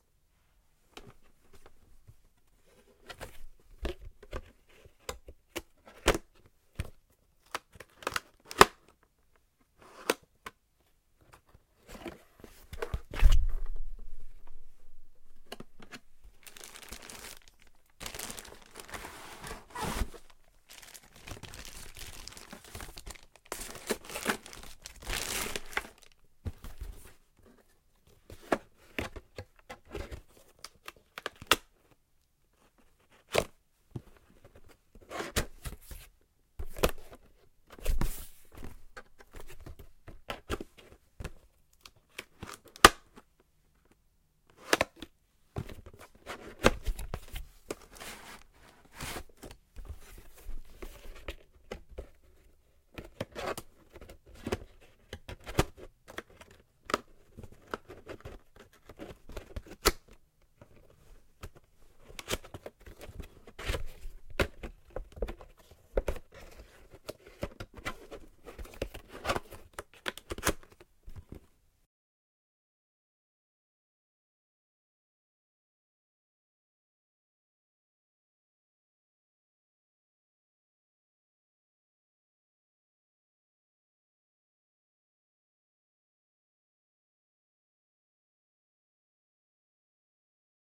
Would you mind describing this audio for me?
Box Rustling
Opening a new box and the contents. Closing the box after hearing the noises of the plastic inside the box.